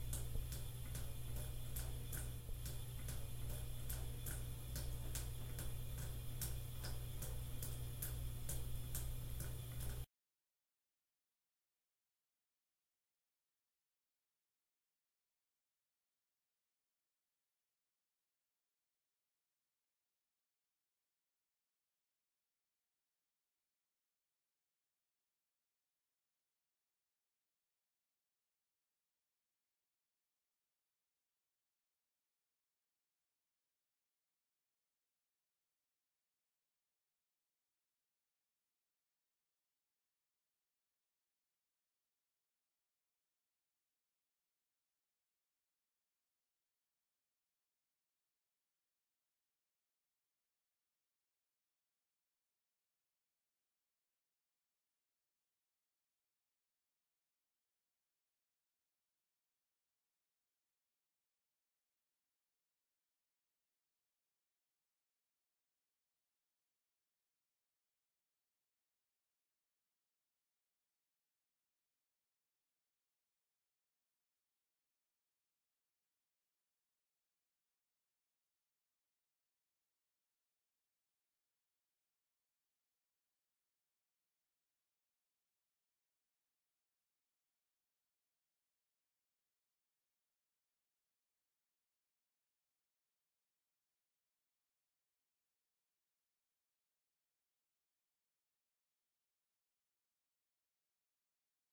Sound of water dripping out of a faucet into a bathtub